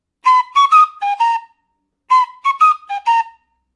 i play my flute in my home studio
A, B, C, D, delta, flute, G, iek, notes